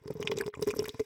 slurping water out of a big glass vase using a rubber hose. could also sound like a bong.
recorded on 9 September 2009
sip, hose, slurp, straw, bong, water, suck